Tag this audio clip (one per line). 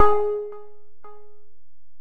reaktor
electronic